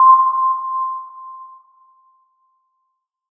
Sonar ping (sine wave)
A self-made sonar ping sound I have made using a very primitive synth and some additional effects.
recorded/mixed/created by
Patrick-Raul Babinsky
Do not forget to credit :)
ping, sonar, submarine, underwater